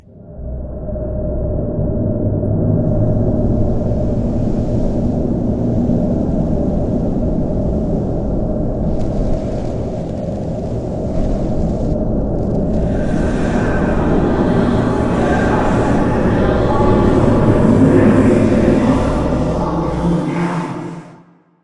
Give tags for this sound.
competition; contest; effects-processing

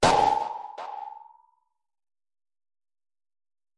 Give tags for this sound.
Gameaudio; SFX; sound-desing; FX; indiegame; Sounds; effects

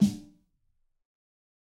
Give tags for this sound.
drum; god; realistic; snare; tune; high; fat

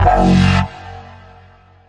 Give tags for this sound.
Sound; Synth; synthetic

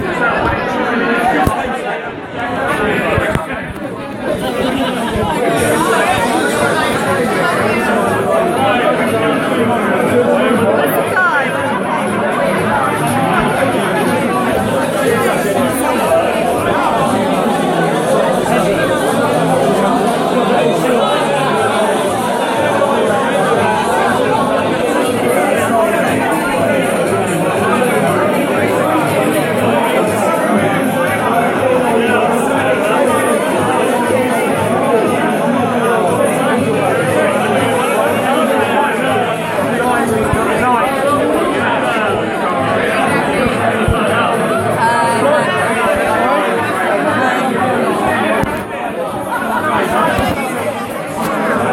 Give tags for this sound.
background; busy; crowd; human

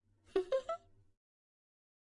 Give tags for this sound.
Woman; Foley

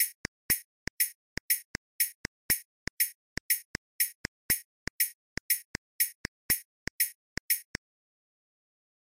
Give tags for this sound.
clap; interesante; sonido

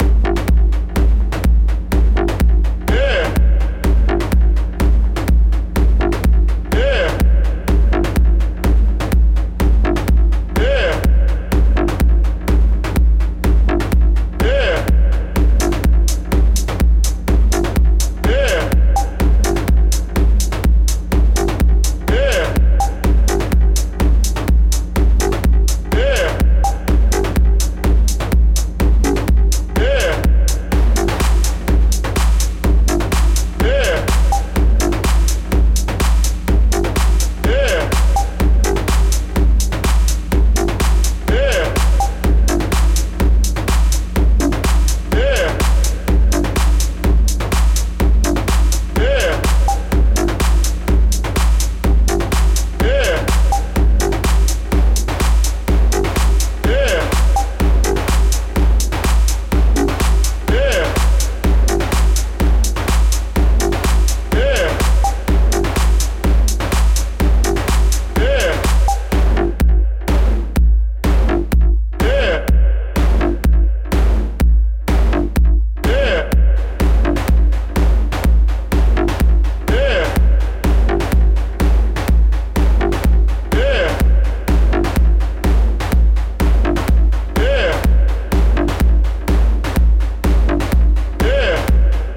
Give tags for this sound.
bounce; club; dance; EDM; loop; original; pan; sound; techno

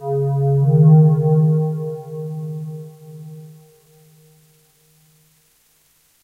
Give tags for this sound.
old; pad

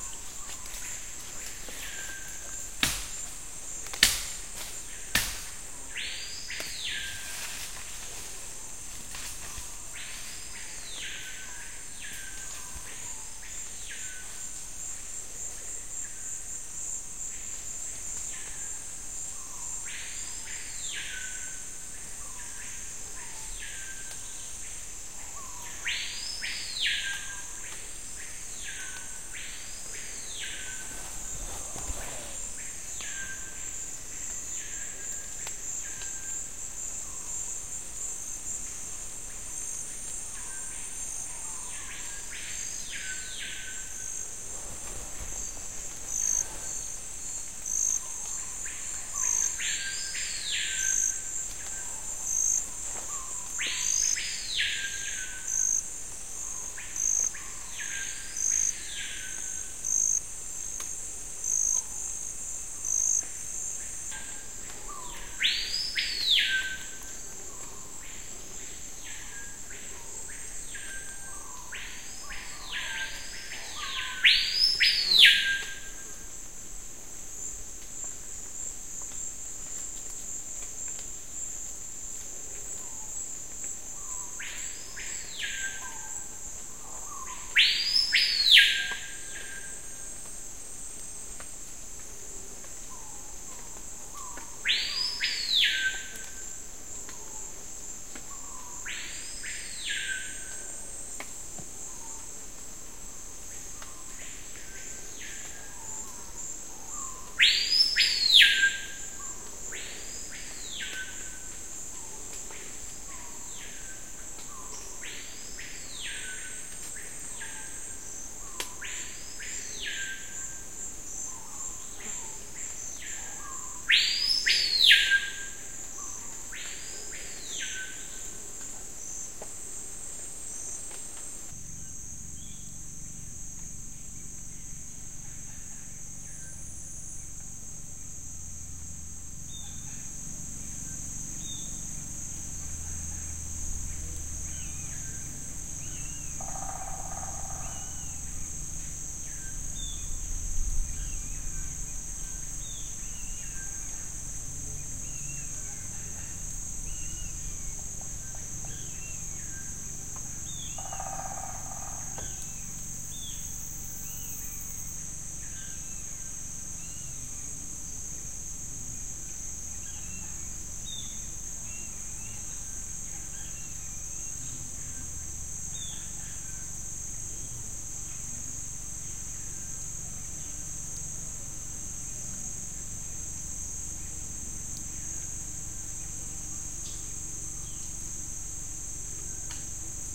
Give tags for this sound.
birdsong; Cricri; insects; rainforest; Lipaugus-vociferans; brazil; tropical; field-recording; crickets; amazon; Screaming-Piha; bird; capitao-da-mata

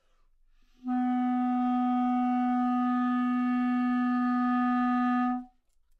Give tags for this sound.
B3; clarinet; good-sounds; multisample; neumann-U87; single-note

